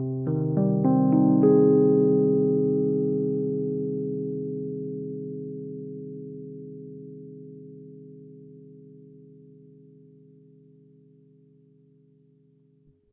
rhodes mystery bed 4
Arpeggio chord played on a 1977 Rhodes MK1 recorded direct into Focusrite interface. Has a bit of a 1970's mystery vibe to it.
electric-piano, electroacoustic